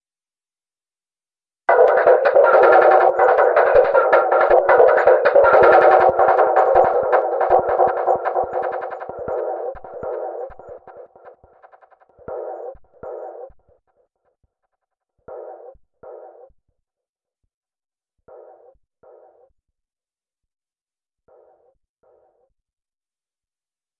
Flower loop 80 bpm 9
Since I really liked his description I had to pay him an honour by remixing this samples. I cutted up his sample, pitched some parts up and/or down, and mangled it using the really very nice VST plugin AnarchyRhythms.v2. Mastering was done within Wavelab using some EQ and multiband compression from my TC Powercore Firewire. This loop is loop 9 of 9.
groove, loop, rhithmic